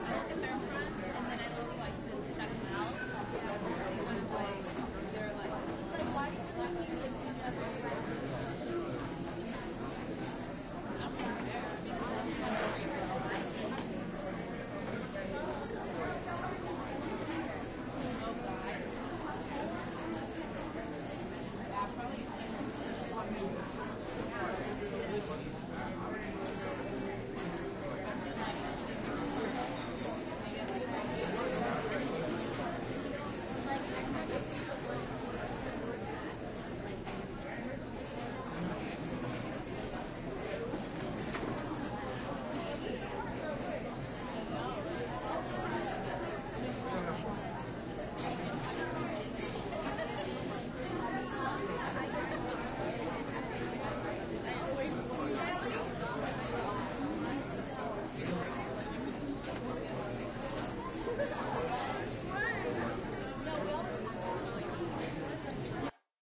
Light cafeteria chatter recorded in the Oglethorpe dining hall at the University of Georgia using a Roland R-09.